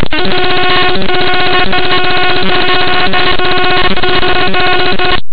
DF2 blip
a noise sample generated with buzzmachines, that recalls some machinery sound
blip, noise, machine, electronic